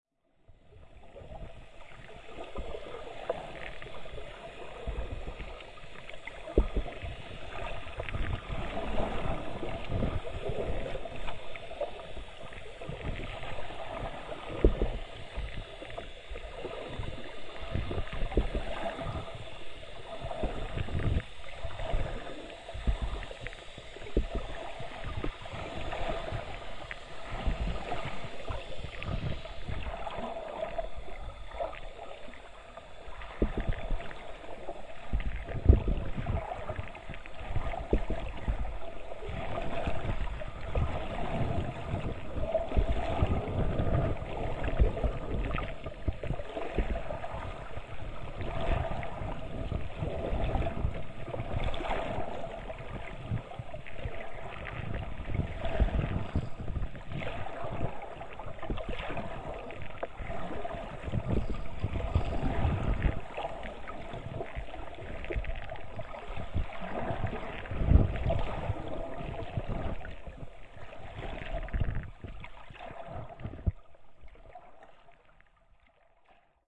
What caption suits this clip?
LakeTahoe Hydrophone
An underwater recording and Lake Tahoe
field-recording, field-recordings, hydrophone, lake, lake-tahoe, marine, submerged, water